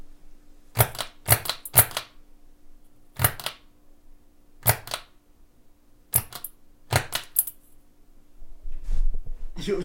Turning a lamp on and off